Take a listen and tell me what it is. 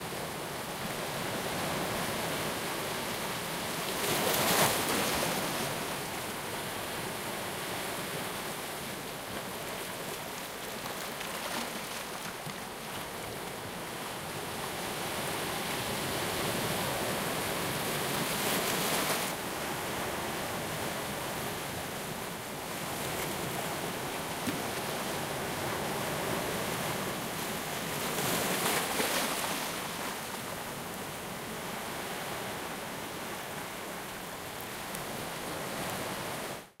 Taken with Zoom H2N, the beaches of Cyprus
water, seaside, coast, ocean, sea, beach, shore, wave, waves